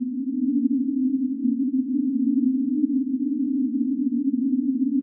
Some multisamples created with coagula, if known, frequency indicated by file name.